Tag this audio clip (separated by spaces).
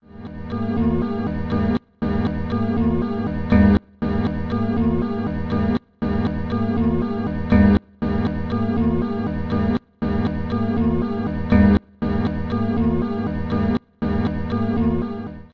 120bpm blip1 loop